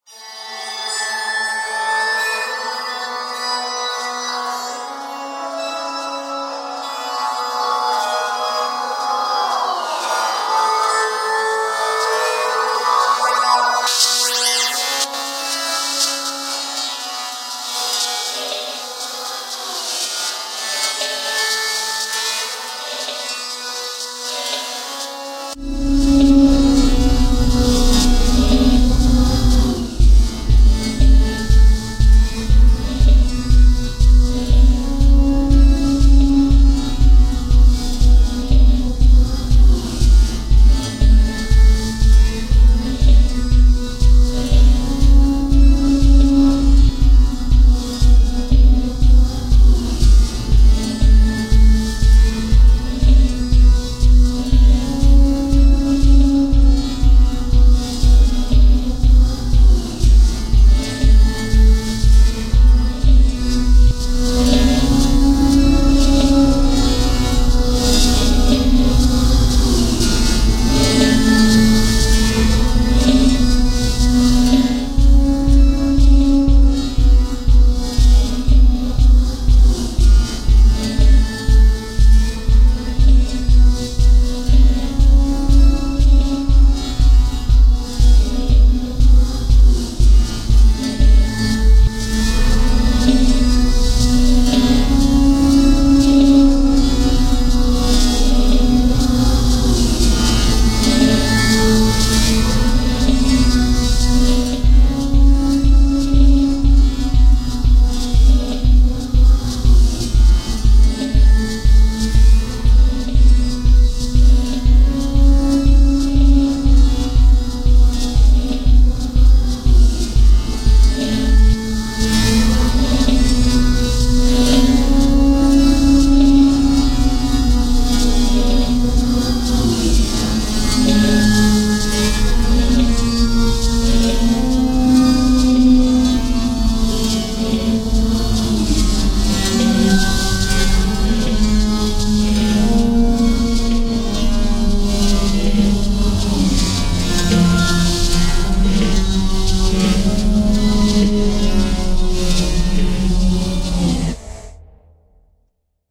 audacity base loop
couldn't get the audacity limiter to pump lol